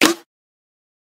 clap snare sample